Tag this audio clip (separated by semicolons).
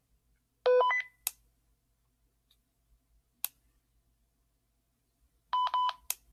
Walkie-Talkie; Button-Click; On-off